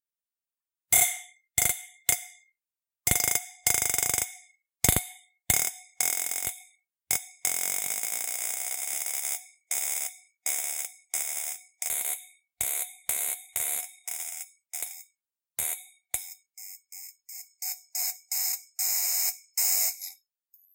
Taser/High Voltage discharge in glass tube
after recording a video (high voltage sparks in chlorine gas) i decided to upload these sweet sounds here
Oh and the video FAILED, on color change occurred
arc, electric, electricity, high-voltage, ozone, plasma, shock, spark, taser, zap